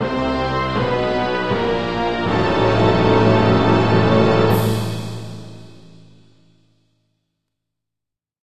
So I decided to create a few failure samples on a music-making program called Musescore. These are for big whopper failures and are very dramatic - they may also be used for a scary event in a film or play. For this project I used violins, violas, cellos, double basses, timpani, cymbals and brass. Enjoy!